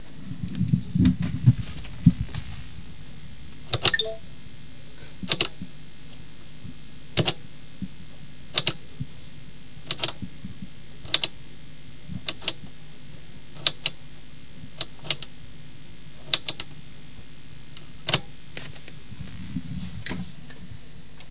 A push button version 1
i have 2 versions